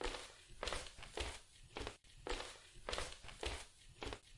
S02 Footsteps Gravel
Footsteps on gravel